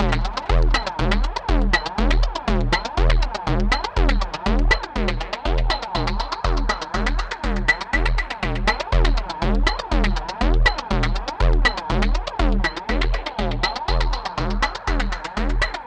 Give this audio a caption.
Zero Loop 10 - 120bpm
Loop, Zero, Distorted